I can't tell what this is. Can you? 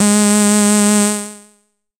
Basic saw wave 1 G#3
This sample is part of the "Basic saw wave 1" sample pack. It is a
multisample to import into your favorite sampler. It is a basic saw
waveform.There is no filtering at all on the sound, so the sound is
quite rich in harmonic content. The highest pitches show some strange
aliasing pitch bending effects. In the sample pack there are 16 samples
evenly spread across 5 octaves (C1 till C6). The note in the sample
name (C, E or G#) does indicate the pitch of the sound. The sound was
created with a Theremin emulation ensemble from the user library of Reaktor. After that normalizing and fades were applied within Cubase SX.